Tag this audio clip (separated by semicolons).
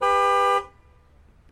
horns; car-honking; car; horn; honk; beeping; beep; honking; road; car-horn; cars; traffic